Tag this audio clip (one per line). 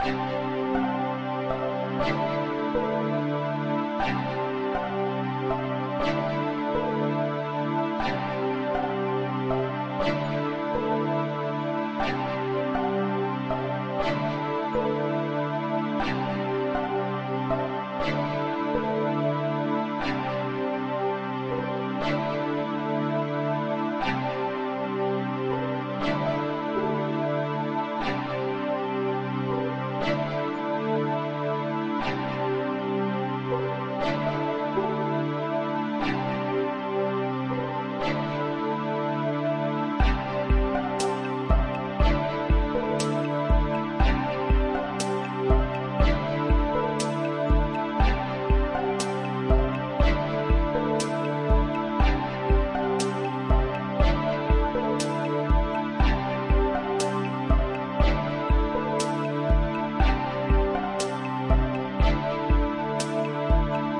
TimeLapse
tape
editing
video
Motivational
soundtrack